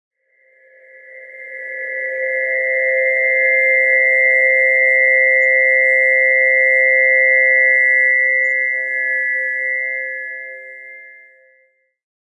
Dog Whistle
Pad sound, high pitched tension builder.